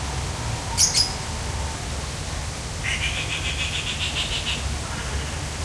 blue bellied roller
Call of a Blue-bellied Roller, with a chirp from a lovebird at the beginning. Recorded with a Zoom H2.
bird, birds, exotic, field-recording, lovebird, roller, tropical